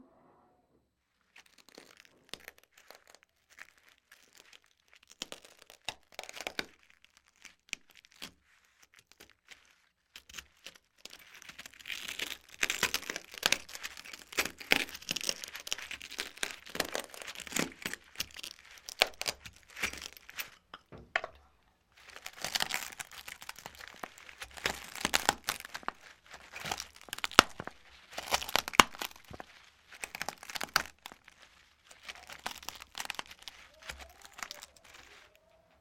Glass crushed 1
glass pieces broken smashed
glass, smashed, broken, pieces